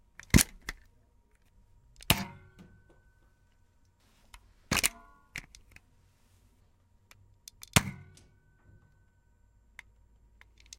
Nerf Tristrike Shot & Reload
Nerf Tristike Shot & Reloaded. Small little beast. It's probably our main workhorse at the office.
Toy, Rifle, Nerf-Gun, Rival, Nerf, Pistol, Plastic, Gun, Reload, Nerfgun, Fire, XShot, Shoot, Shot, Foam, X-Shot, Ball, Chaos, Blaster, Dart